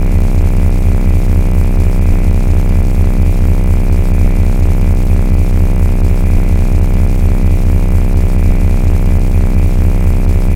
Static Idle Loop Finished
Synthetic Sound Design, created for an Indie Game
Credits: Sabian Hibbs : Sound Designer
Light Saber Sounds